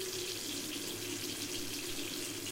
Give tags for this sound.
water; sound

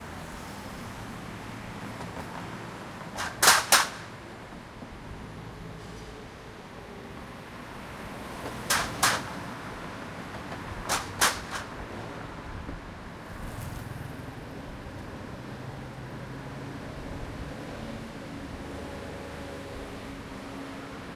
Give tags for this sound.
cars; field-recording; street; traffic; urban

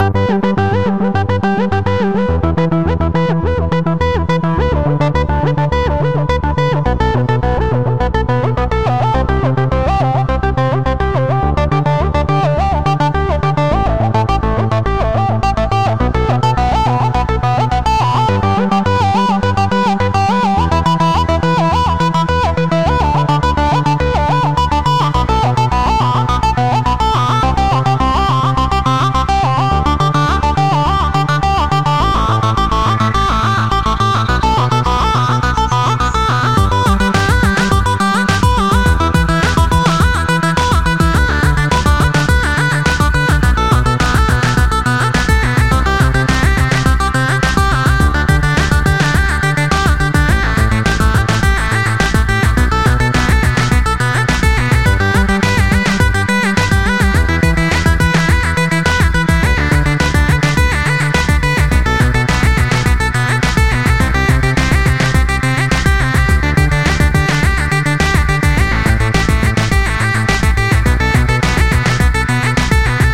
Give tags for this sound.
zebra; melody; synth; aleton

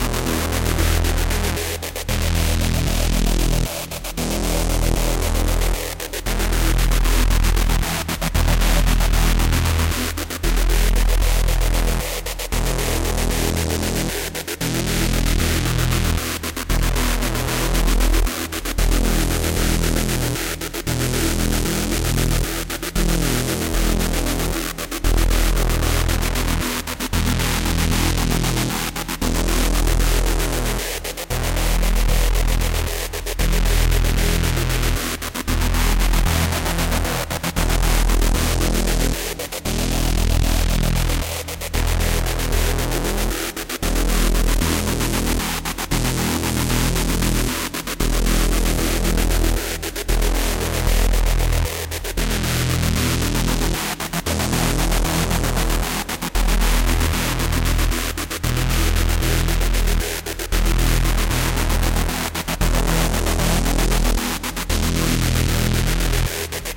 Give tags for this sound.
115; 115-bpm; 115bpm; 2; 3003; 303; acid; bass; bpm; E; E-2; E2; effects; EQ; flange; flanged; fx; lfo; movement; pattern; phase; phased; pitch; pitched; sequence; sub; tone

